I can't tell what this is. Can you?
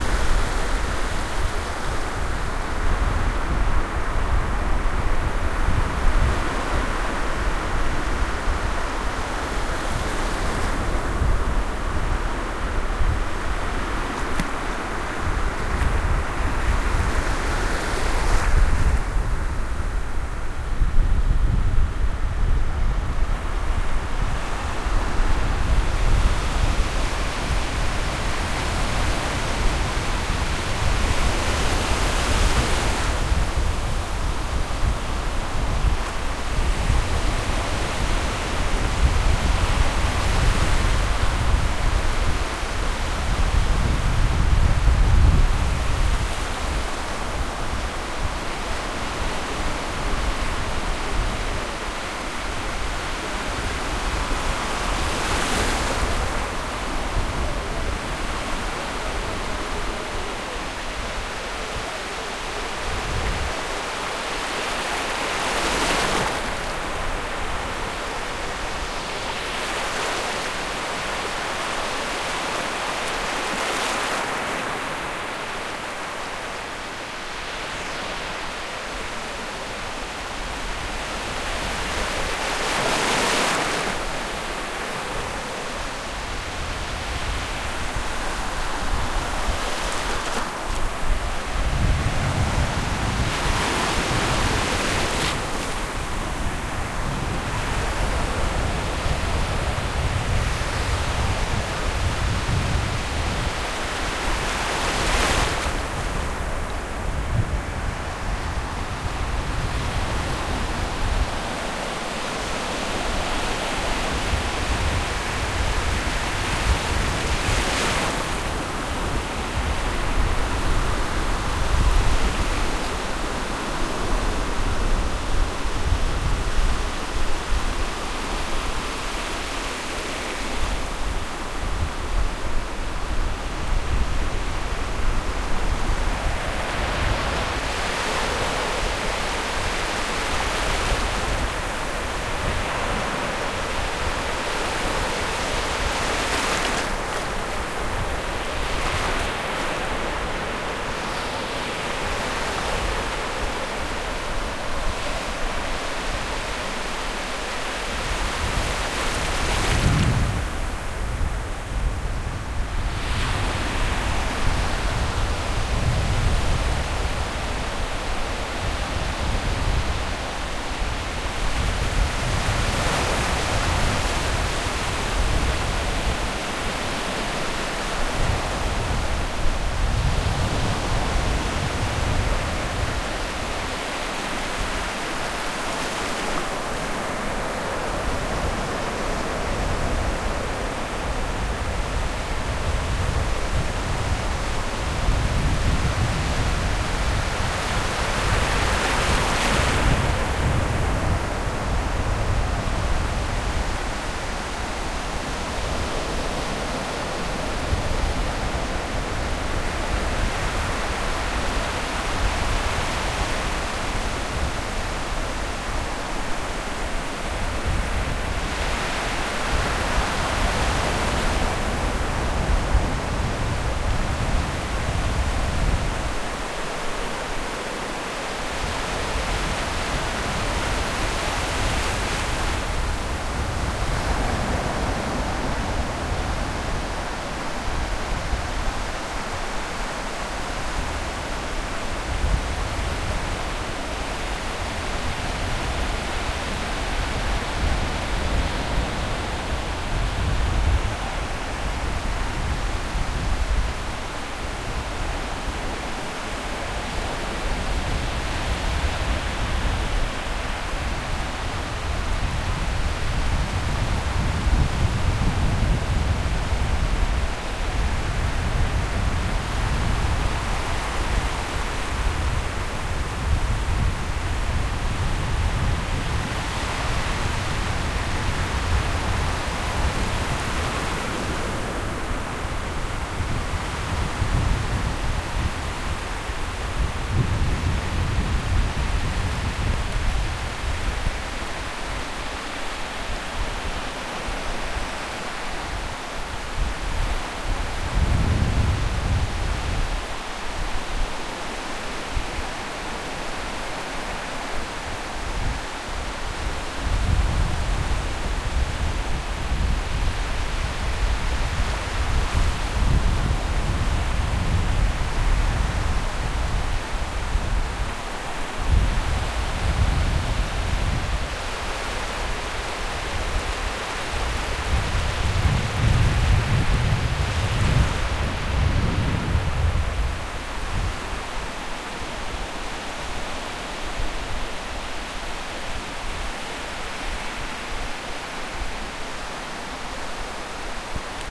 Waves at the beach
Recorded at the beach with some wind. Used a deadcat + H1